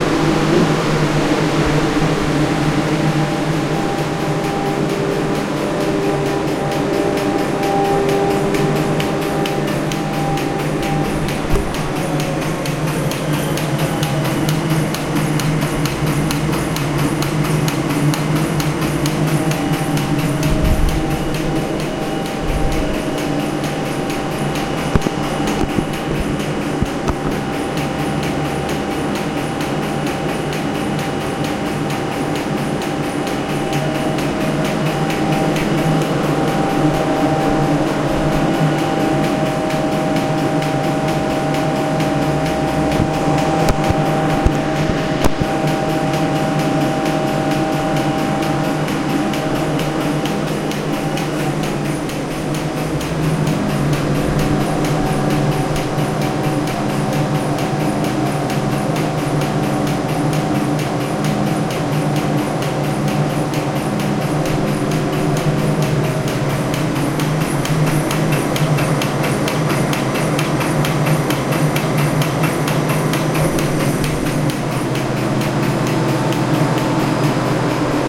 Mop Recycler
Sounds of a clicking mop water recycler and air compressor in the background.
Clicking, Hum, Compressor, Mechanical, Machinery